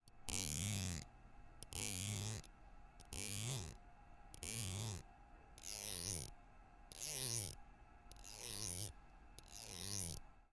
whisk handle - metal teaspoon
scraped the edge of a metal whisk with a metal teaspoon: four times in one direction, four times in the other direction.
MTC500-M002-s14, rubbing, scraping, teaspoon, whisk